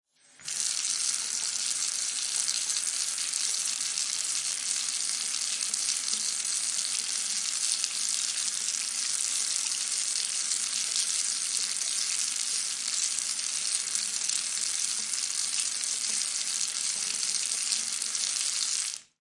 Sound of waterflow
Panska, flow, Czech, Pansk, flows, water, waterflow, CZ